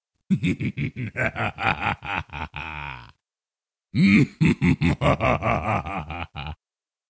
Okay, this man really likes to see you suffer. He dips his chip twice!